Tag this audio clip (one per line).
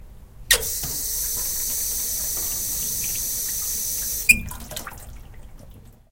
bath
bathroom
sink
tap